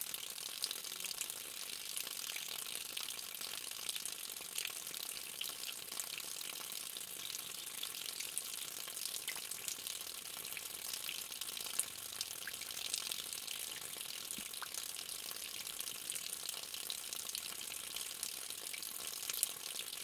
Water Fountain 05
field-recording, water, ambiance, atmosphere, ambient, fountain, ambience, nature, stream